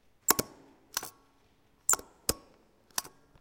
This sound belongs to a stapler. It was recorded inside the upf poblenou library with an Edirol R-09 HR portable recorder. The microphone was placed near the sound source so as to achieve a high input level without the need of increasing a lot the gain.
UPF-CS14, campus-upf, crai, library, paper, percussive, staple, stapler, upf